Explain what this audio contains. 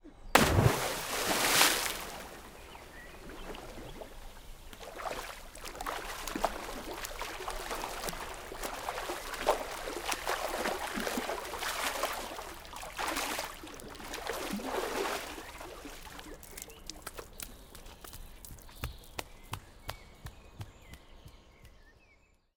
someone is diving in an outdoor pool, splash, then swimming, then walking on a tiled floor. birds around.
France, 2012.
Recorded with Schoeps CMC6 MK41
recorded on Sounddevice 744T